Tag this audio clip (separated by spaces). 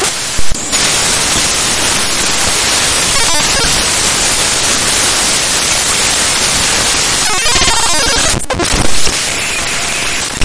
binary random digital noise data file electronic glitches distortion computer glitchy raw extreme loud harsh glitch